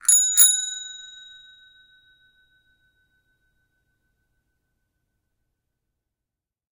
Bicycle bell recorded with an Oktava MK 012-01